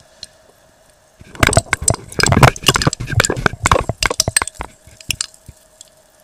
Glass of Ice Cold Soda
I made this noise by swishing my soda glass around. The ice in it made the clinking noise.
Noise, Glass, Soda, Clinking, Liquid